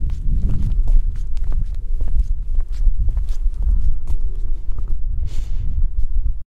Wind and Walking - Pants Rustling
Walking outside, you can hear the textile of pants.
fabric, foots, footsteps, jeans, outside, pants, run, running, rustle, rustling, scraping, textile, walk, walking